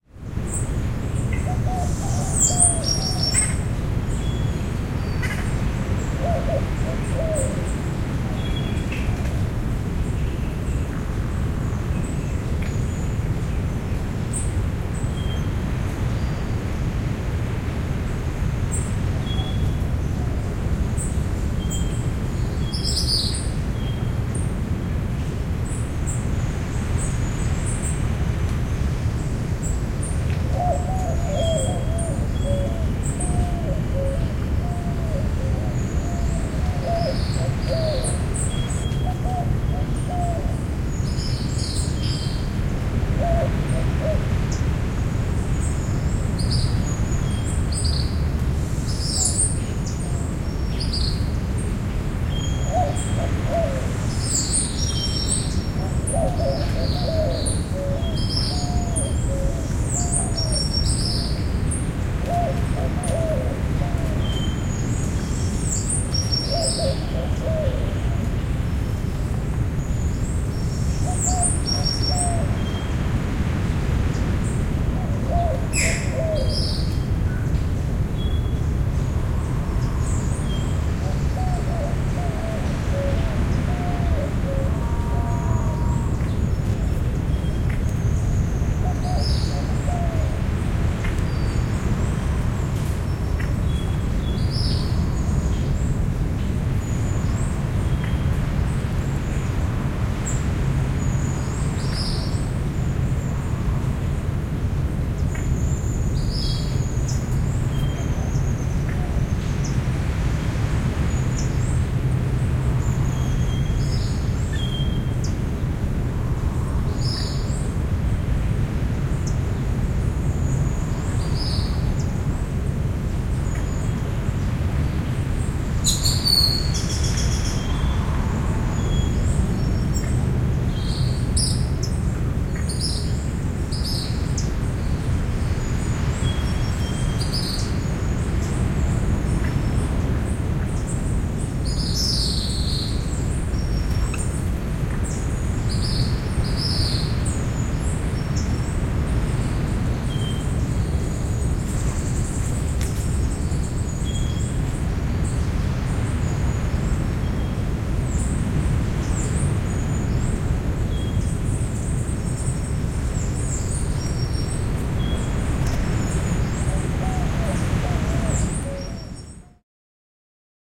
Dominikaaninen tasavalta, linnut, aallot / Dominican Republic, birds in the jungle near the seashore, hollow waves
Eksoottisia lintuja viidakossa lähellä meren rantaa, taustalla kumeaa aaltojen kohinaa.
Paikka/Place: Dominikaaninen tasavalta / Dominican Republic
Aika/Date: 13.03.1996
Finnish-Broadcasting-Company, Yleisradio, Birdsong, Merenranta, Viidakko, Caribbean, Linnut, Karibia, Yle, Soundfx, Sea, Meri, Field-Rrecording, Linnunlaulu, Seashore, Tehosteet